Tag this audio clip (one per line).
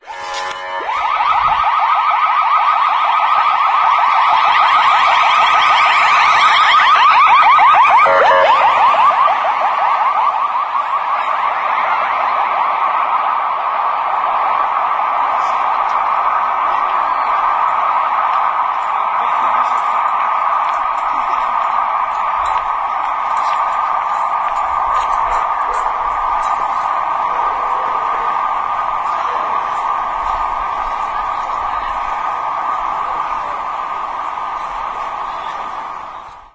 ambulance
field-recording
signal